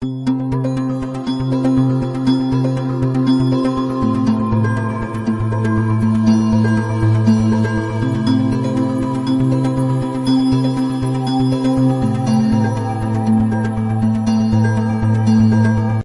daydream pad
..my first test, sry it is not a masterpiece... it`s mad by two synth`s in logic.. hope to bring later some good stuff ;)
trance, synth, deep, dream, melody, pad, electronic, atmosphere, synthesizer